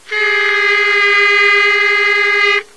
Double clarinet playing B on both horns. Recorded as 22khz